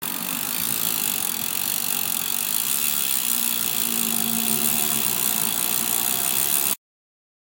MySounds GWAEtoy Bike
field, recording, TCR